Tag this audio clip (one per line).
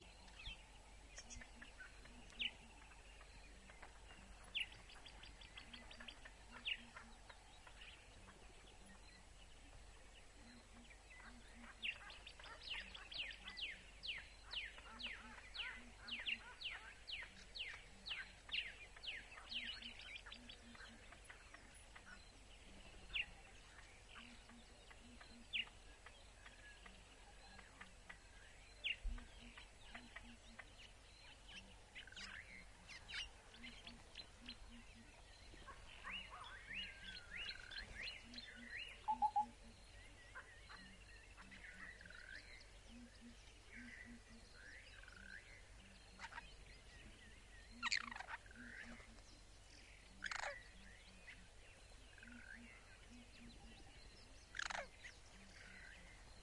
birds
morning
nature